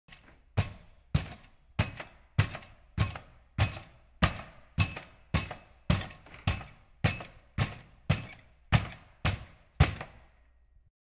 A recording of a wheelchair being regularly bounced on the floor, mean to simulate one coming down stairs (note: fade-up not built in).
stairs, wheelchair